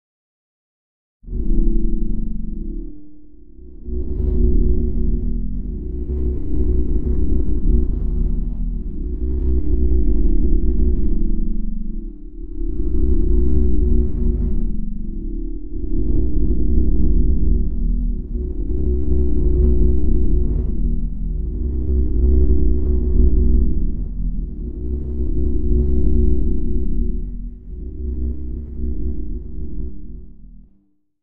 Mchn AmbienceAB
Part of a Synthetic Machinery Audio Pack that i've created.
amSynth and a load of various Ladspa, LV2 filters used. Enjoy!
Sci-fi, Machinery, Machine, Synthetic, Mechanical